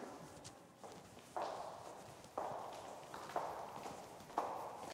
PASSOS GARAGEM 002
passos garagem footsteps garage